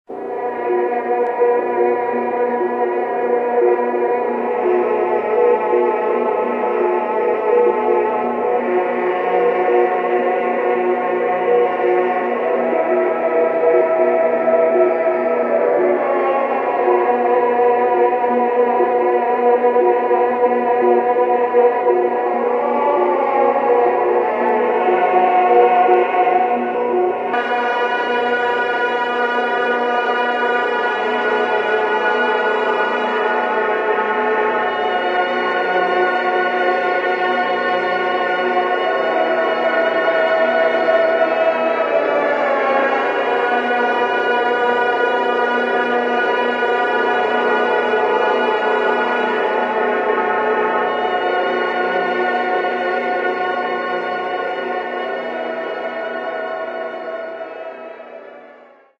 Glorious Intro
beautiful, film-production, glory, intro, light, mystic